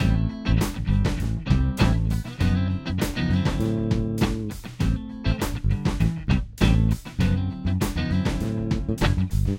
Funky Loop
A loop recorded with my electrical guitar and some free virtual instruments that I play using my keyboard.